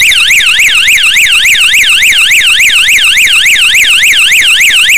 Sound of Harley Davidson siren
davidson, siren